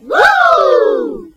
an old "woo" I did for videos. the voices are all me mashed together
voice, woo, group